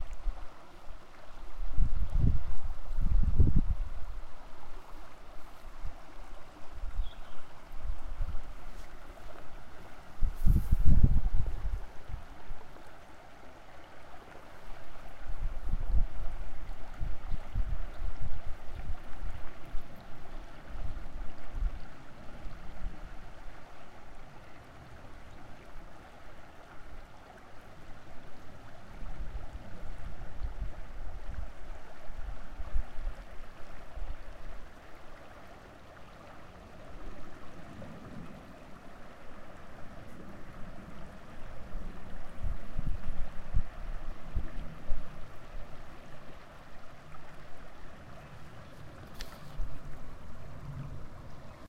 000102 0179S3 denniston stream sounds
Quiet small stream in Upstate New York in the fall. NTG-2, Tascam-DR-60D
flow, gurgle, water